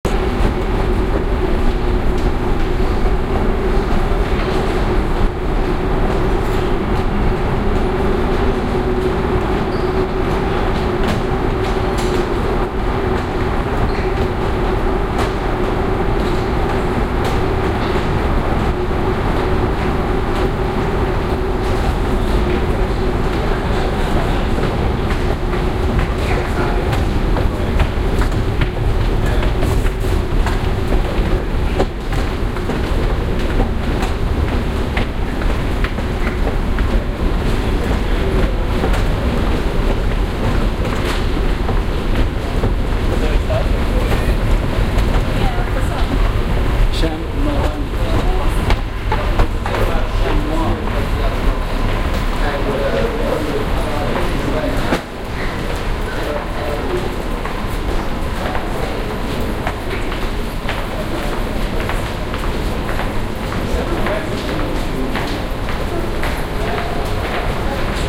Angel - Longest Escalator going down